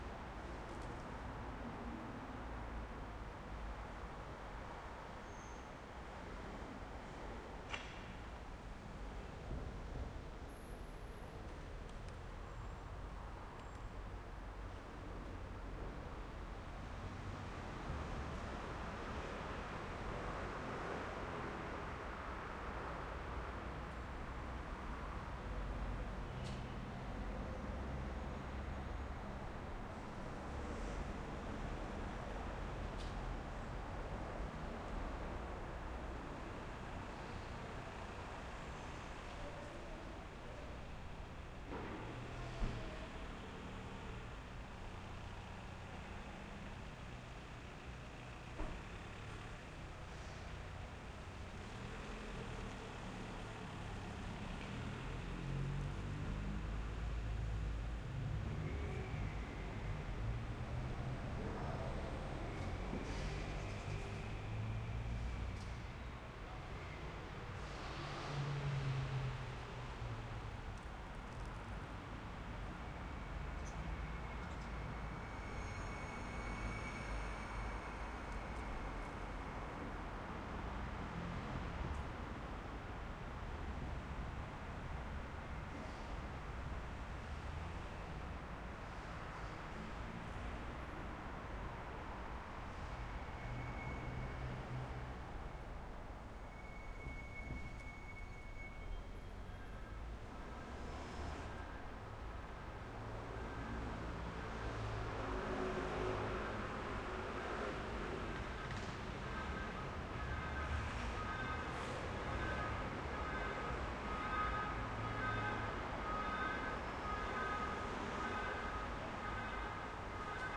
Urban Ambience Recorded at Can Clariana in April 2019 using a Zoom H-6 for Calidoscopi 2019.